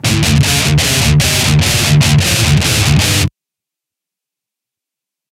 DIST GUIT 150BPM 5
Metal guitar loops none of them have been trimmed. they are all 440 A with the low E dropped to D all at 150BPM